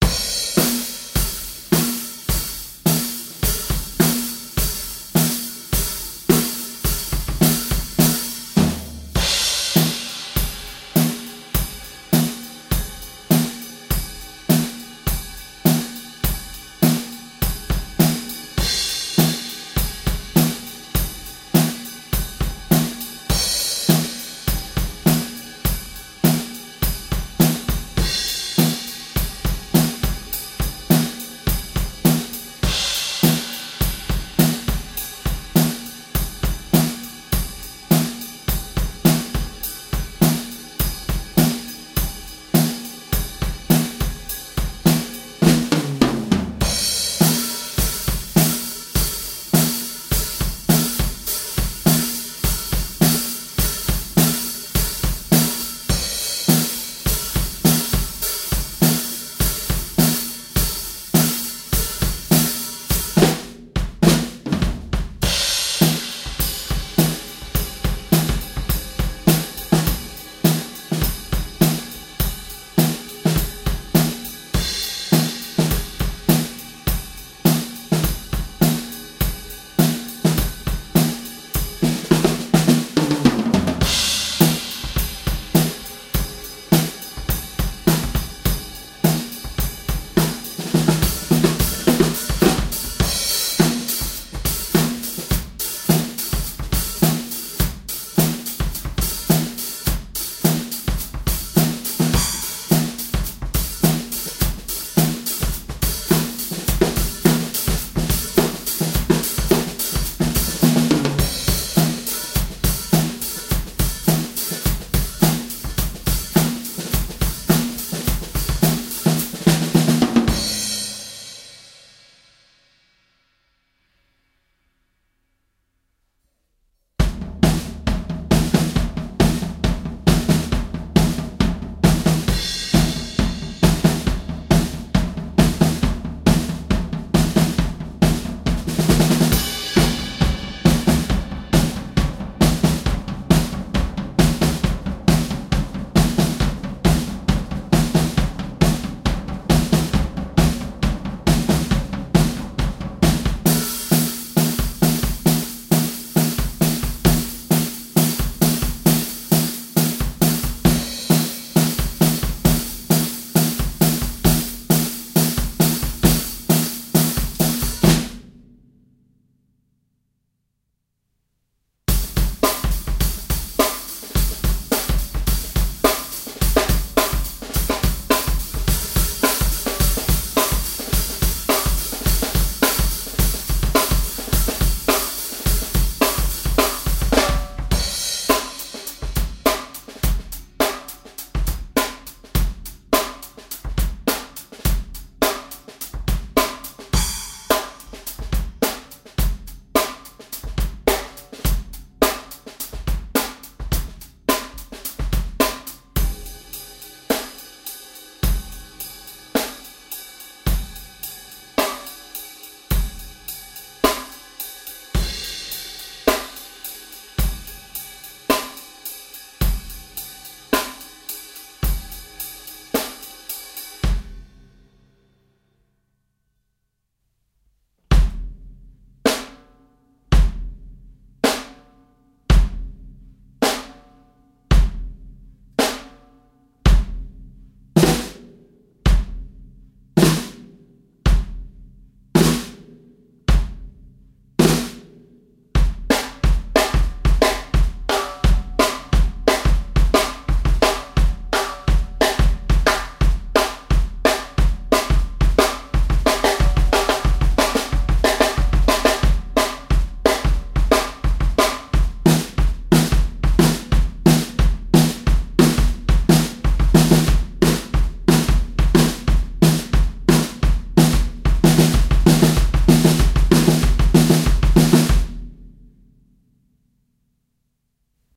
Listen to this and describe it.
Rock drum beats, various
Some drum beats I played on my Tama Superstar Hyperdrive kit. Tama Starphonic snare drum + Mapex Fastback 12" snare drum.
Some rock, some pop, some hip hop.
beat, drum-loop, drums, hip-hop, improvised, looped, rock, Tama